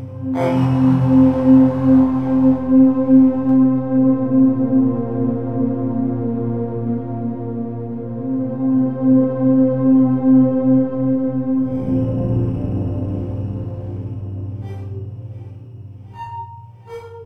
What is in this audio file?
Smooth, flowing synth pad sound.